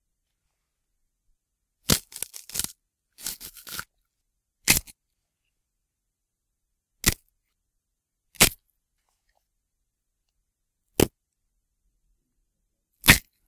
Recorded celery snapping in Audio Booth, with Zoom H2 portable recorder, for use as Foley broken bones sound. Edited with Audacity.
Recorded in isolation Audio Booth